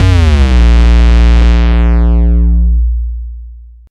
Jungle Bass Hit B0
Jungle Bass [Instrument]